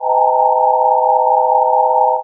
Random Sound created with SuperCollider. Reminds me of sounds in ComputerGames or SciFi-Films, opening doors, beaming something...
ambience, ambient, atmosphere, electronic, horn, sci-fi, sound, supercollider